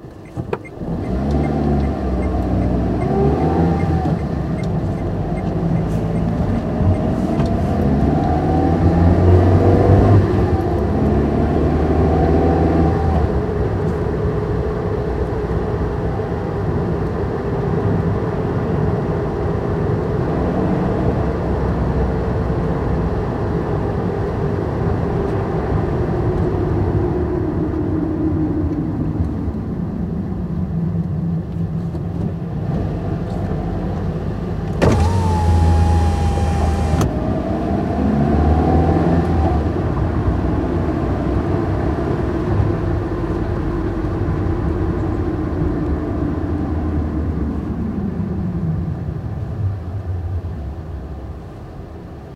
In Car Driving
A drive in my car.
Recorded with Edirol R-1 & Sennheiser ME66.
inside, engine, roadtrip, car, highway, trip, city, road, interstate, drive, traffic, bumps, driving, way